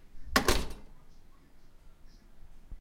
Door Closing
The Sound of Closing a Secure Door.
Close, Closing, Door, House, Secure